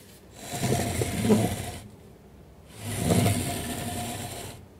Sliding Chair
Dragging a chair along a smooth floor. Recorded with a ZOOM H2N.
slide, scraping, chair